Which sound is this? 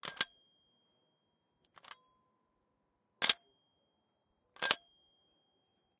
Metallic attach, release
Attaching then releasing a metallic tool head.
A pair of Sennheiser ME64s into a Tascam DR40.
clank, release, hardware